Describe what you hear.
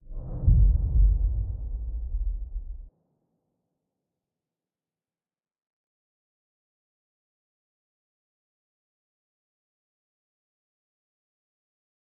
Impact Boom 7
boom, impact, thud